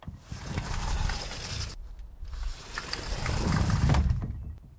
This is a sliding door opening and closing.